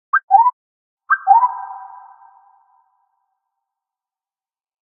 Magic Spell/Curse (Dumbledore Style)
Attempt to recreate from scratch a magic wand sound, similar to the one Dumbledore uses in Harry Potter and the Order of the Fenix, when he casts a water spell against Voldemort . Recreated with a Roland JD-Xi + Protools + Roland Quad-Capture. The first one is a dry version, this way you can add your own reverb to your liking.